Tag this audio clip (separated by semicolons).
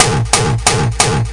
beat; dirty; hardcore; hard; distortion; 180; kick; distorted; bass; kick-drum; kickdrum; 180bpm; single-hit; gabber